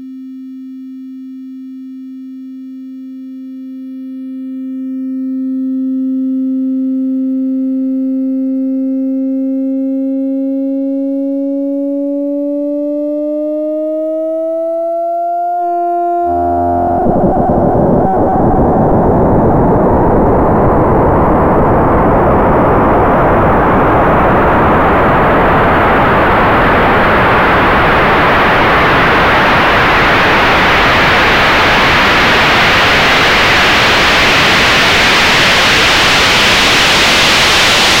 Kamioka x-Mod 1 mono
A little dare I am running with user gis_sweden.
The challenge was to create a sound where 2 oscillators cross-modulate each other.
gis_sweden will use his modular synth. I will use my virtual modular synth Kamiooka.
You can hear gis_sweden's sound here:
My sound looks very interesting if you change the display mode to spectral.
The parameters I have used:
2 sin oscillators
cross-modulate each other (FM)
amount of cross-modulation ramps up linearly from 0 to max (100%) during 20 bars at 120bpm
Created with Kamiooka in Ableton Live
Sound converted to mono in Audacity. No effects or processing.
kamiooka, chaos